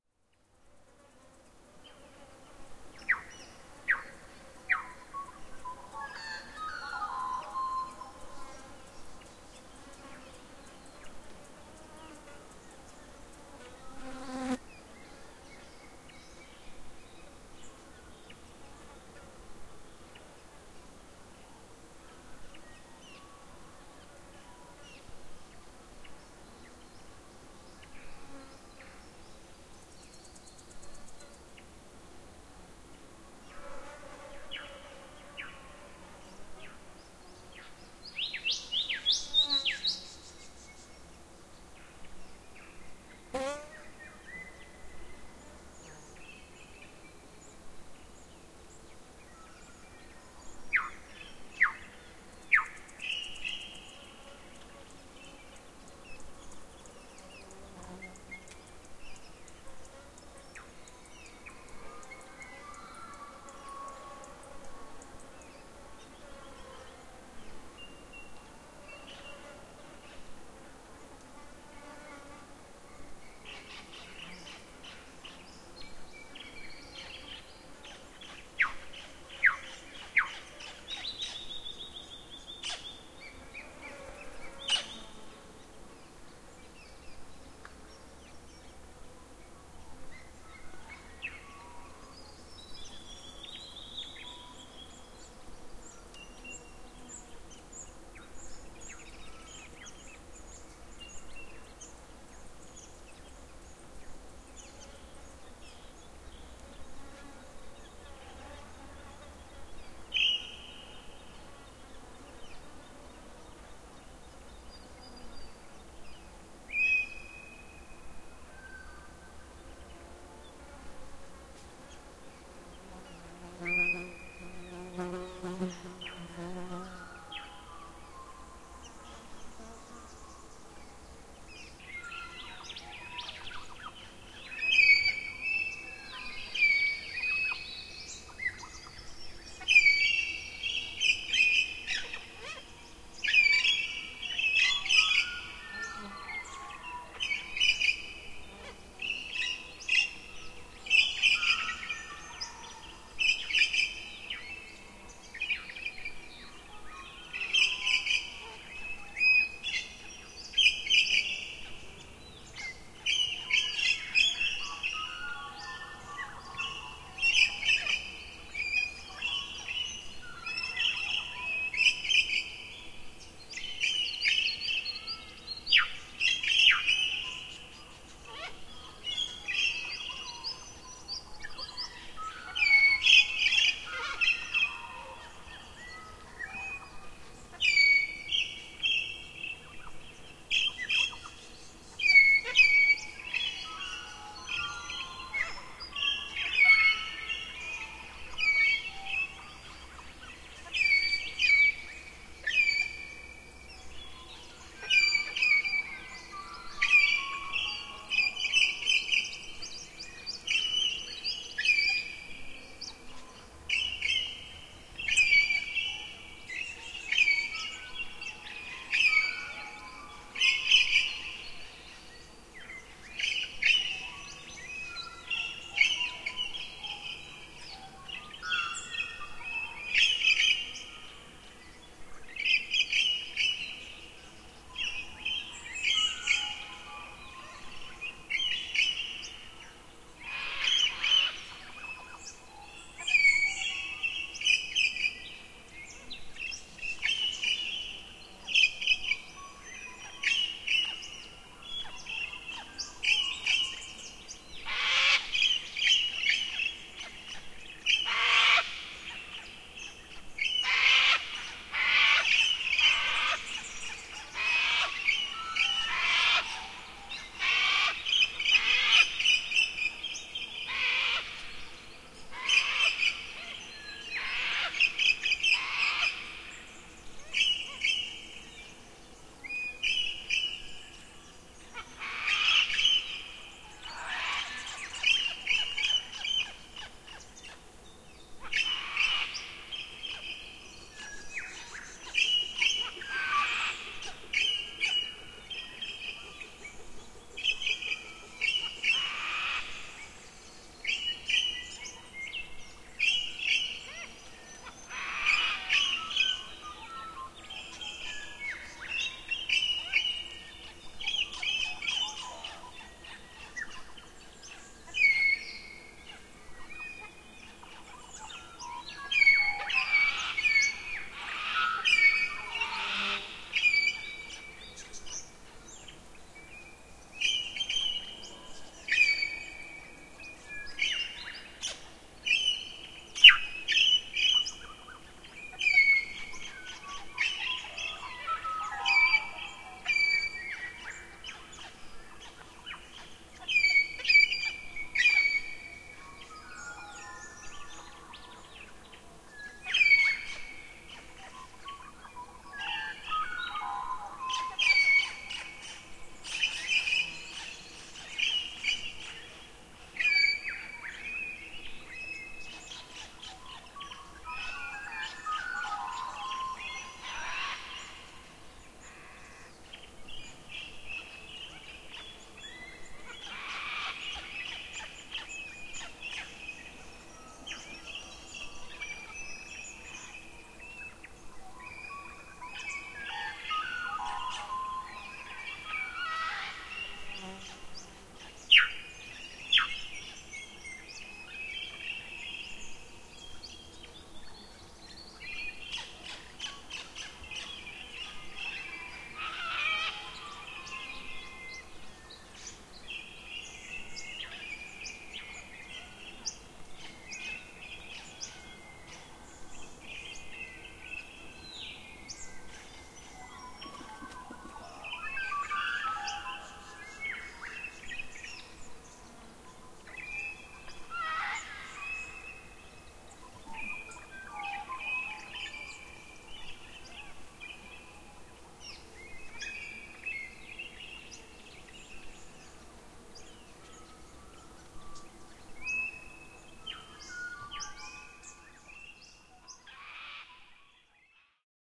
Fryers Forest Dawn long version
Recorded near Lake Haridas, Fryers Forest on 23rd February 2012.
cockatoo, forest, birds, atmos, fly, magpie, australia, insect, australian, field-recording, currawong